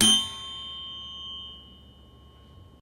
Twenty-first recording of sword in large enclosed space slicing through body or against another metal weapon.